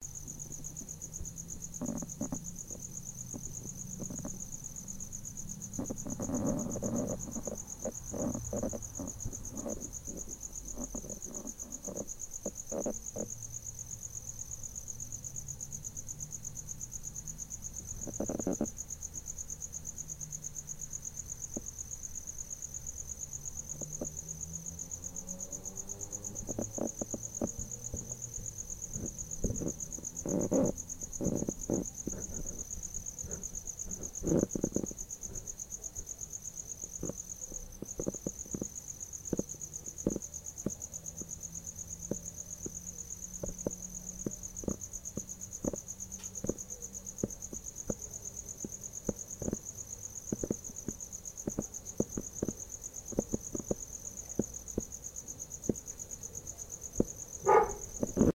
Night noises crickets
The singing of Crickets
Singing-crickets, Crickets, Night